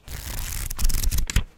Chatter book pages.
page, chatter, book